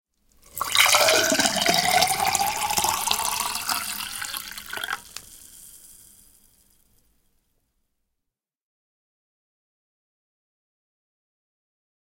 Sparkling Water 01
Pouring Perrier sparkling water into a glass
DIY contact mic
2018